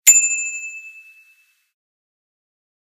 Just a sample pack of 3-4 different high-pitch bicycle bells being rung.